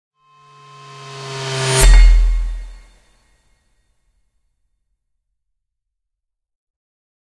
Transition M Acc
Short transition with metal stab
stab, transition